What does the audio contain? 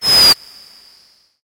Moon Fauna - 107
Some synthetic animal vocalizations for you. Hop on your pitch bend wheel and make them even stranger. Distort them and freak out your neighbors.
creature, fauna, sci-fi, sfx, sound-effect, synthetic, vocalization